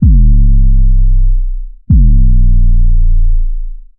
if bassdrop
A BassDrop, requested by a user.